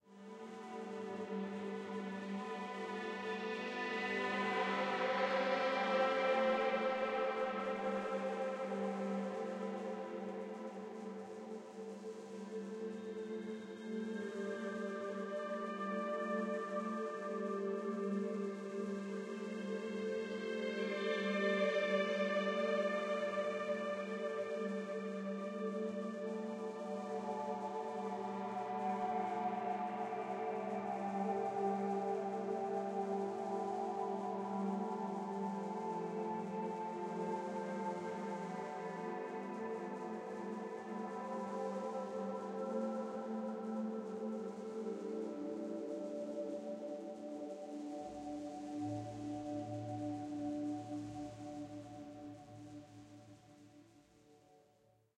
FX I am perpetual now!
A couple of years ago I did some recordings with a Korg PS 3100. I recently took a part of the session and did some "appropriate" treatment ;-). Needs more amplification (sorry!)
ambient, chords, enoesque, ethereally, korg-ps-3100, melancholic, mysterious, nebulous, processed, sad, soundscape, weightless, whale